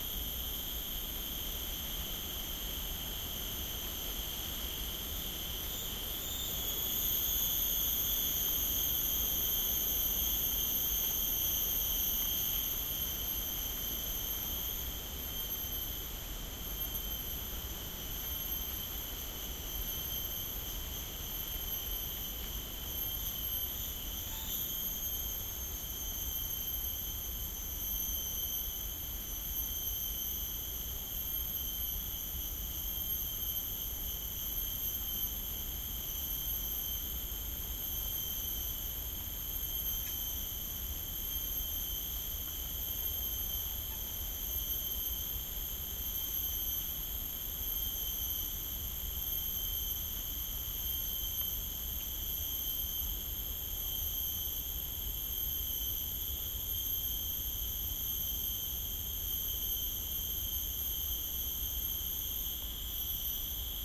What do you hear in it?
Bako National Park, Borneo Island, Rain Forest Ambience daytime 01.10.2013 Roland R-26 OMNI Mics
Recording made with Roland R-26 OMNI Mics at Bako National Park, Borneo Island, Rainforest ambience during daytime of 01.10.2013
Very loud cicadas included)
Borneo, cicadas, tropical, rainforest, exotic, Malaysia, field-recording, insects, jungle, ambience, loud